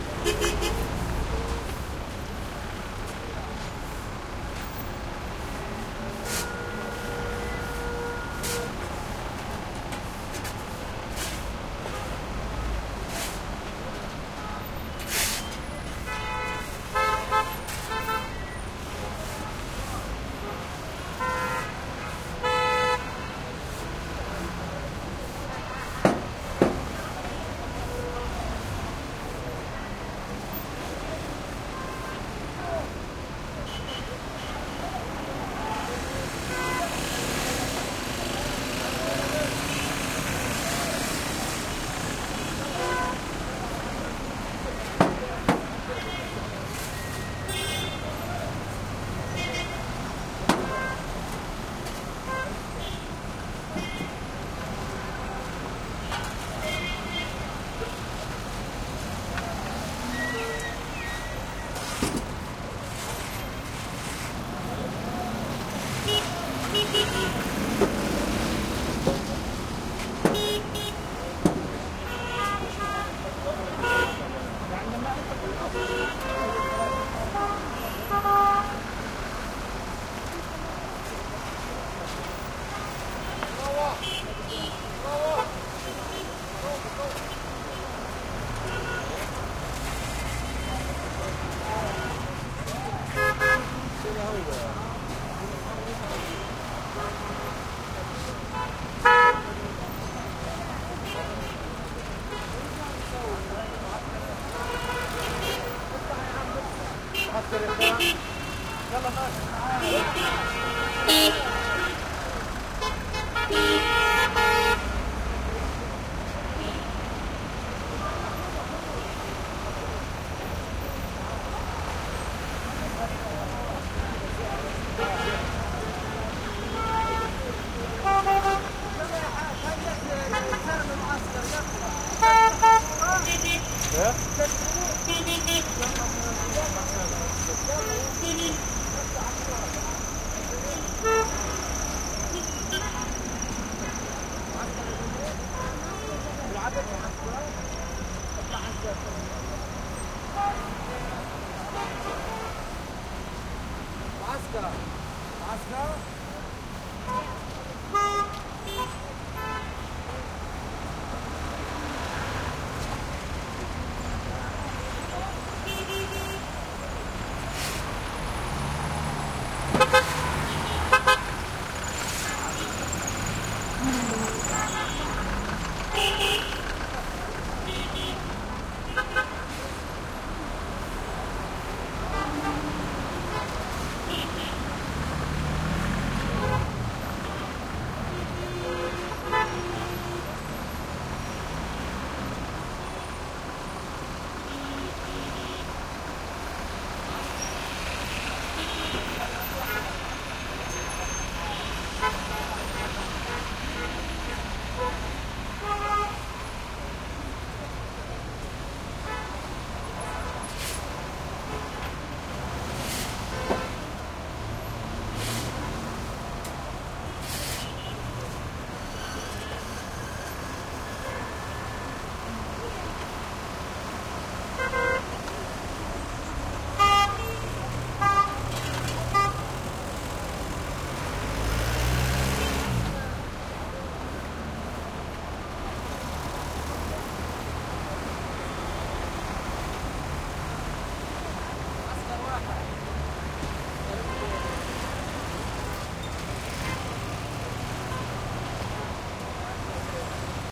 traffic medium Middle East busy intersection throaty cars motorcycles horn honks horses people arabic Gaza Strip 2016
medium, East